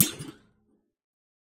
BS Hit 23
metallic effects using a bench vise fixed sawblade and some tools to hit, bend, manipulate.
Metal, Bounce, Hits, Effect